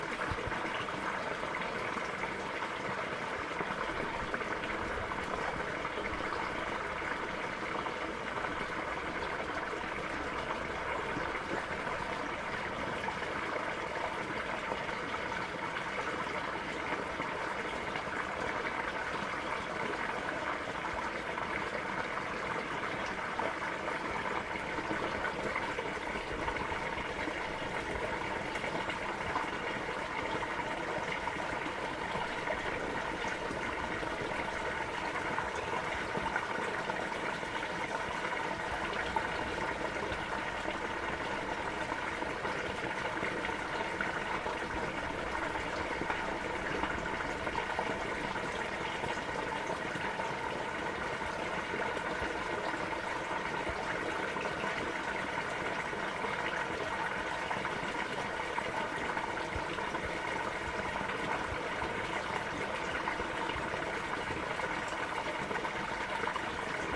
Stream going through pipe
Recorded using an AT8015 shotgun mic at a point in a stream where water is exiting a pipe under a dirt bridge in a stream in the woods.
brook, echo, pipe, stream, water